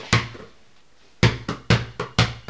anahel balon2 2.5Seg 11
bounce, bouncing